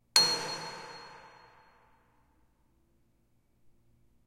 Here's one from a series of 'clang' sounds, great for impact moments in trailers & commercials, or to layer up with other sounds. They are somewhat high-pitch, so they might mix well with low frequency drums and impact sounds.
Recorded with Tascam DR-40 built-in-mics, by hitting a railing with a pipe in a stairwell and adding a little bit more reverb in DAW.
clang, hit, impact, steel